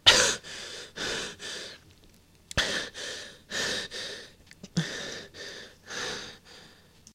Breathless Panting 2
A young adult male panting and struggling to breath after being choked or over-exerting himself.
This was originally recorded for use in my own project but here, have fun.